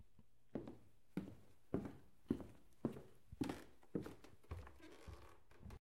Footsteps on Wooden Floor
Footsteps on an interior wooden floor.
footsteps, wooden, floor, interior